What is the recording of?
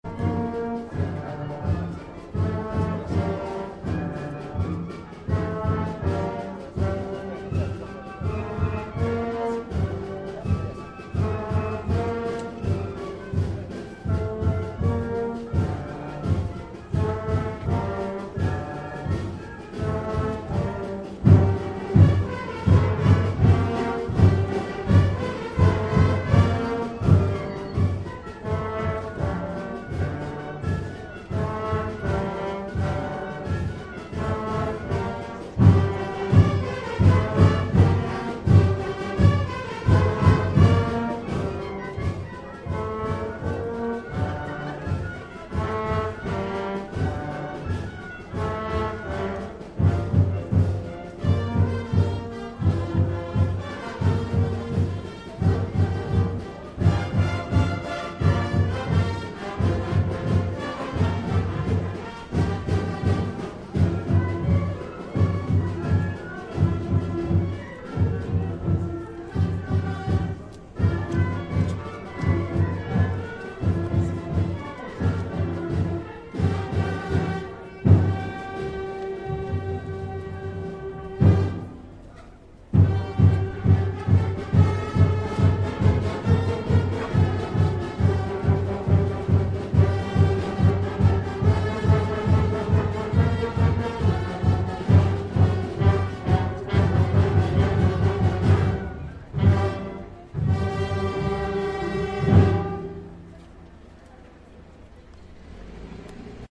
peruian marchingband rehearsing
A marching band in Peru rehearsing
marchingband, musical, peru, practice, rehearsal, rehearsing